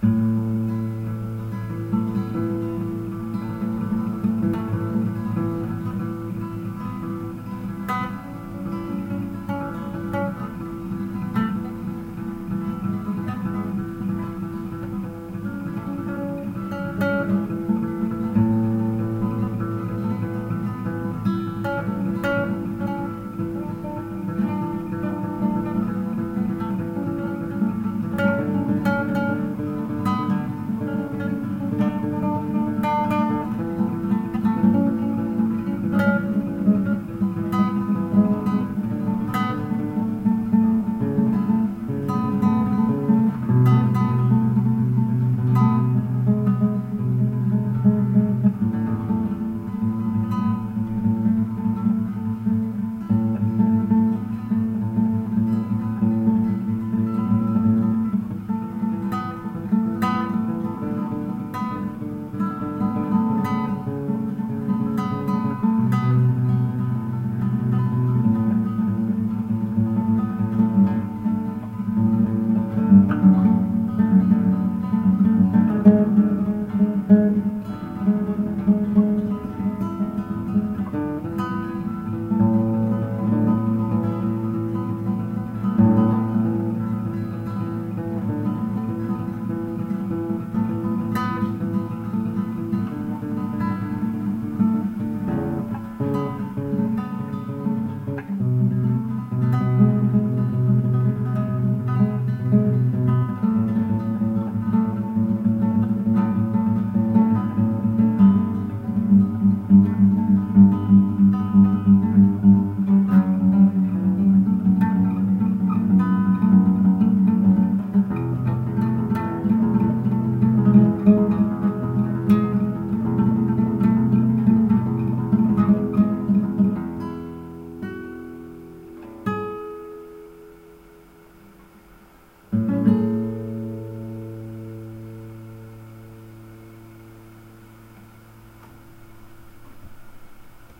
Tremolo pattern in key of E on nylon string guitar (Yamaha C-40).
classical, fingerstyle, nylon